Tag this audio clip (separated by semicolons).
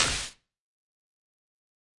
drum; hits; idm; kit; sounds; techno